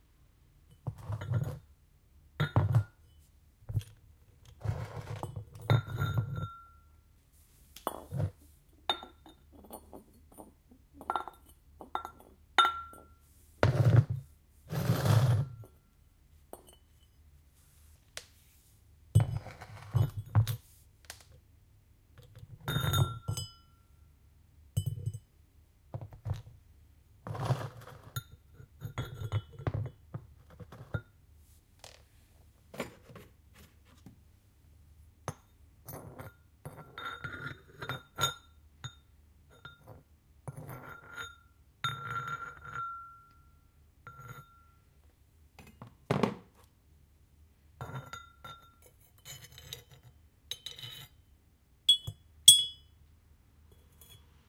Three ceramic objects - a bowl, a bottle and a jug - clanking against each other, being handled and put down; At the end the bowl is scraped slightly and hit with a metalic object.
If what you seek is not amonst these sounds but you need ceramics, consider checking out my other sounds, i have a few more Pottery-clanking sounds there.